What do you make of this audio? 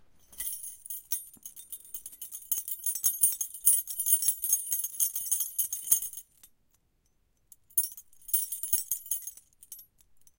keys stir
chain, key, keychain, movement, stir